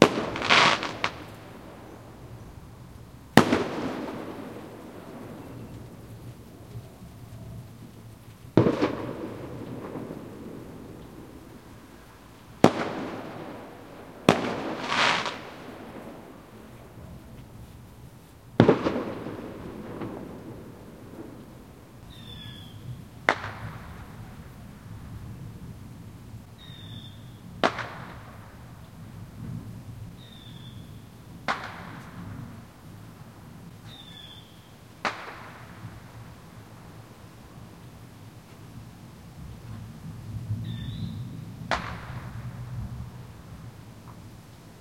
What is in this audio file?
Fireworks recorded at night, from my balcony. It was August 1st, our national holiday in Switzerland.
Recorded with a Tascam DR-05. No post-process.